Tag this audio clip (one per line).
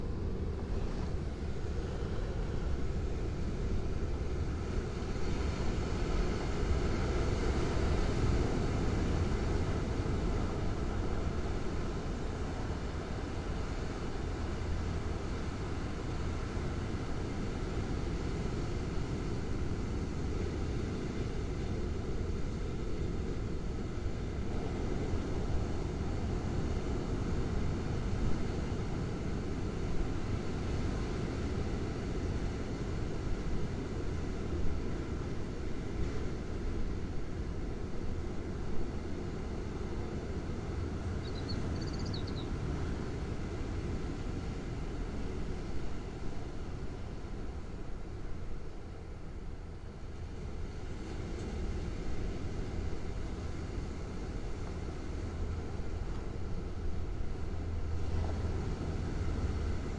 beach
ocean
waves